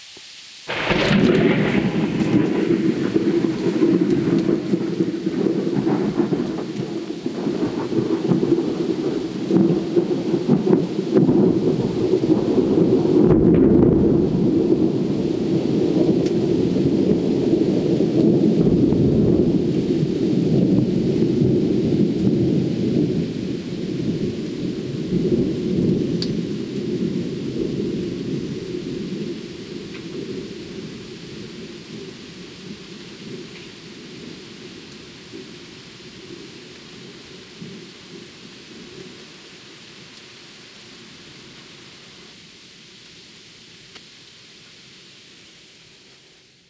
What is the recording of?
Close thunder with camera click - July 23rd 2017
Close and loud thunder (the lightning hit the local lake, 400 meters east of my position), caught in front of my house, during a thunderstorm on july 23rd, 2017 (2:30 AM). Too bad a camera click is to be heard too while the thunder kicks in...
Recorded in Village-Neuf, Haut-Rhin, Alsace, Grand-Est, France with the built in microphone of my JVC GZ-R415BE camcorder during the night from july 23rd to july 24th of 2017, as a multicellular thunderstorm hit the town.
The sound is provided you without any post processing (except the removal of some beginning / ending clicks).